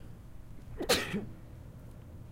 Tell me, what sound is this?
sneezing. Microphone used was a zoom H4n portable recorder in stereo.
ambient, field, Human, recording